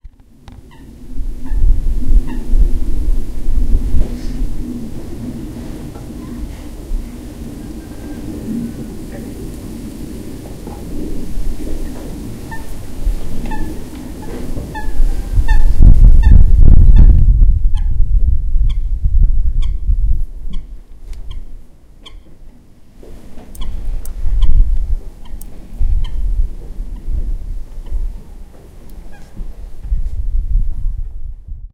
delta cal tet
The sound of a bird singing. Recorded with a Zoom H1 recorder.
Deltasona, birds, el-prat, water, birdsong, field-recording